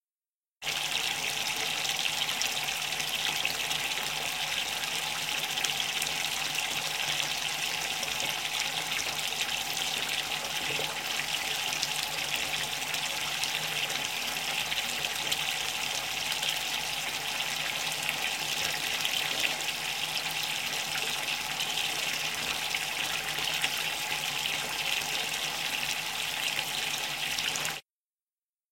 Filling Bathtub with Water
bath, fill-water, water, Bathtub, bathroom, filling, fill-bathtub